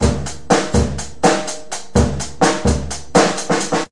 In this recording you can hear me playing the drums. It is a very bad recording because my equipment is not the best at all and I recorded down in my cellar where the acoustic is not very good!